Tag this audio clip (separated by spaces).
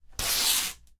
Cloth Tear Torn